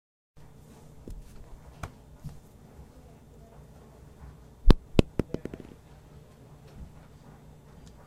ball drop
droped a foam ball and there you have it sfx just like that plez enjoy.
ball, drop, foam